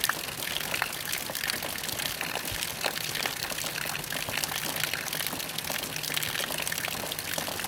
The sound of falling rain drops, seamlessly looped.